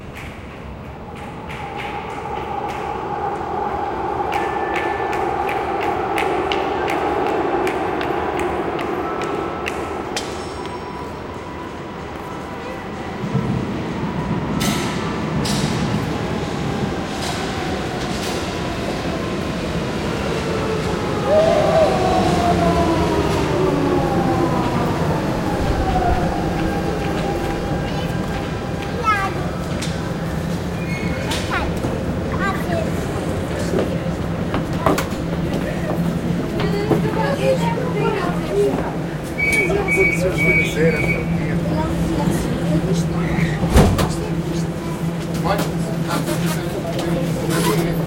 subway chelas
subway arrives at Chelas Station in Lisbon Portugal
chelas, subway, underground, lisboa